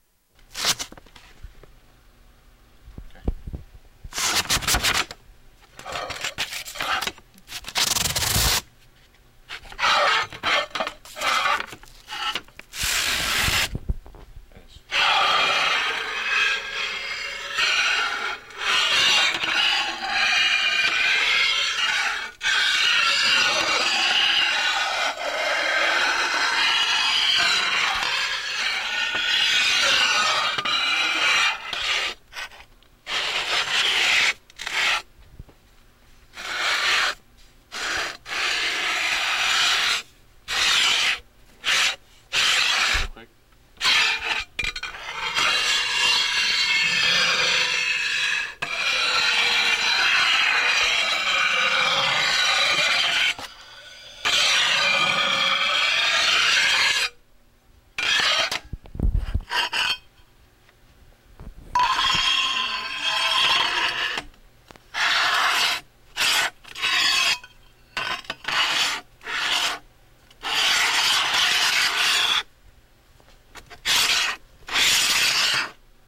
I recorded this sound back in 2002. Made taking a piece of tile and scrapping it across the floor.